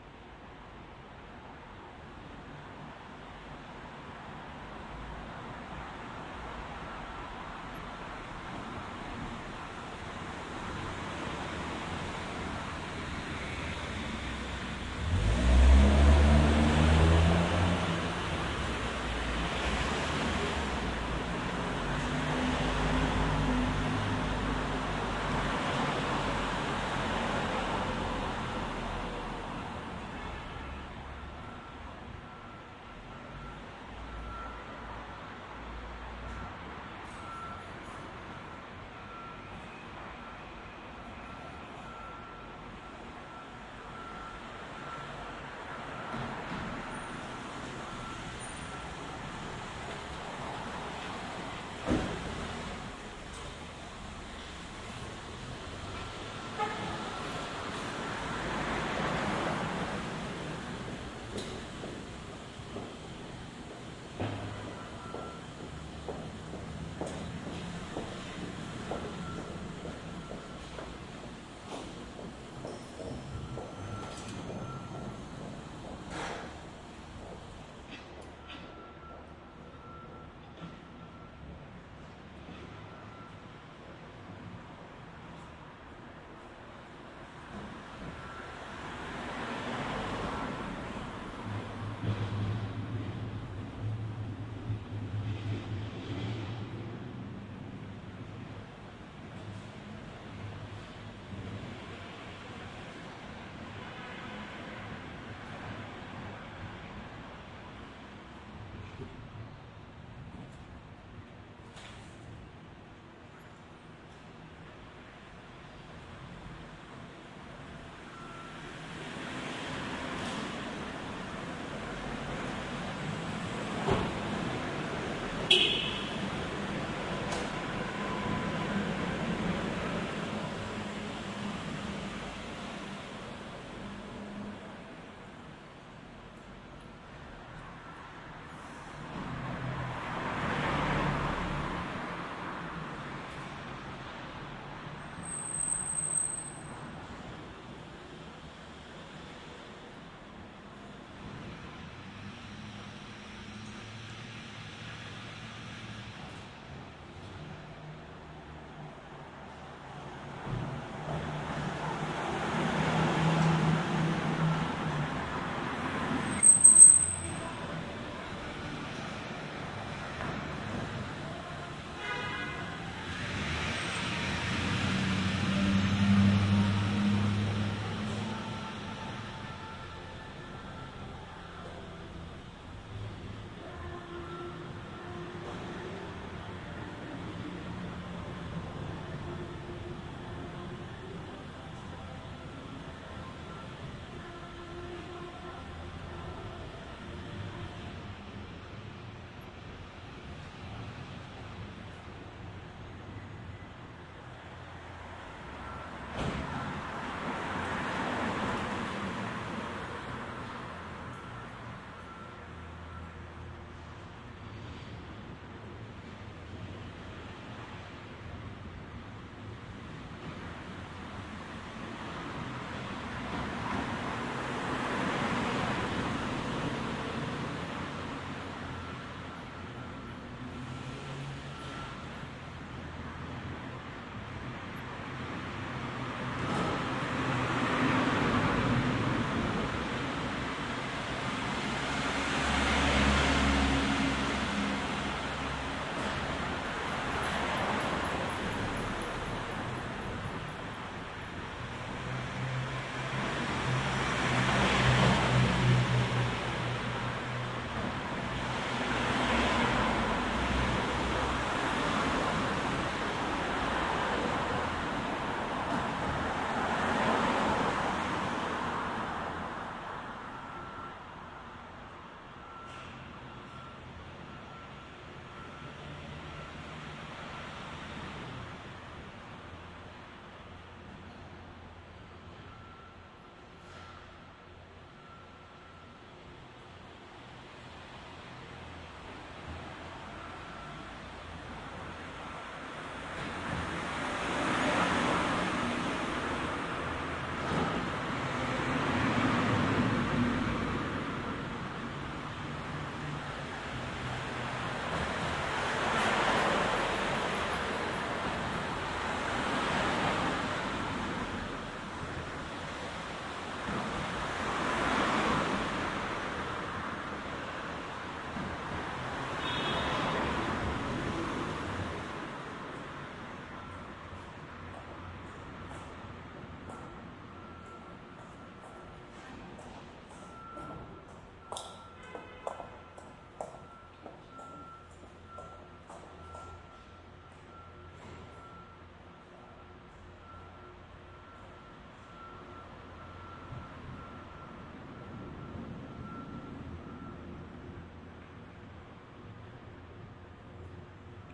Stereo recording of cars driving by, parking, and drivers closing locking their doors and walking by. Plenty of brake squeals, engine noises and city hum.
Recorded with: Audio Technica BP4025, Fostex FR2Le